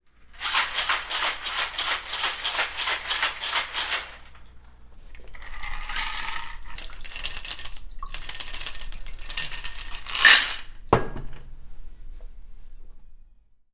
martini shake pour
Cocktail shaker with ice sounds, opened, poured and set on countertop recorded with a Sterling Audio ST51 condenser mic